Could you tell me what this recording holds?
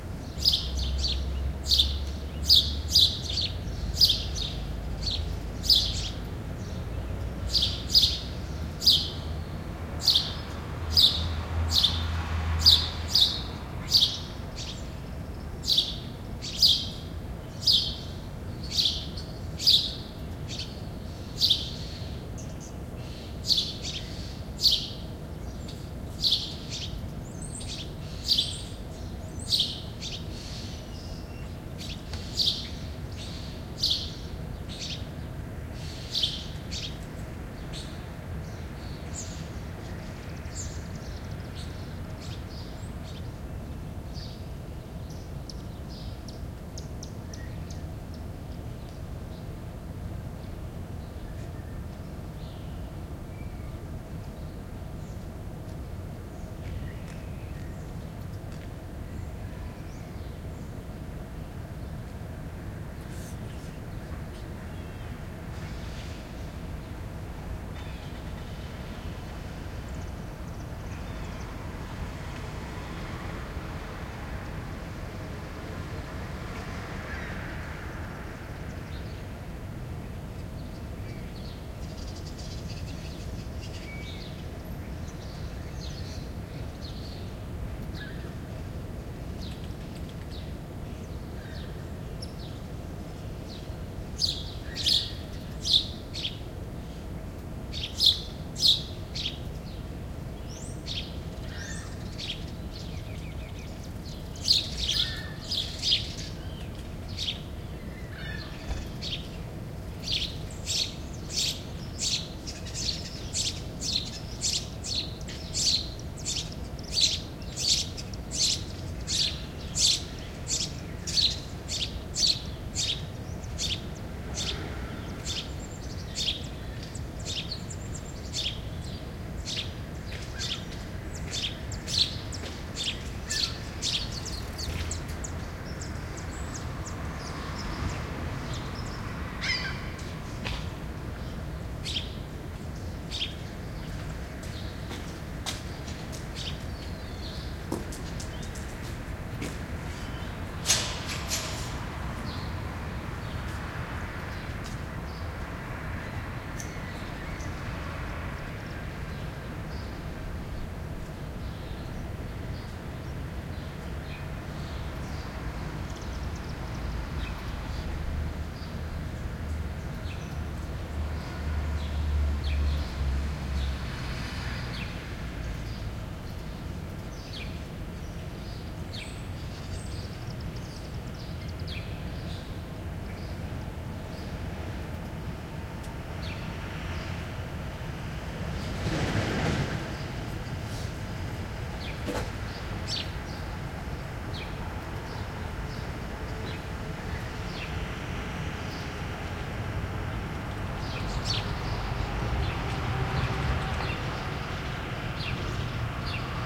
park city urban small winter birds light traffic distant seagulls Montreal, Canada
birds, seagulls, city, traffic, park, Canada, winter, light, Montreal, urban, distant, small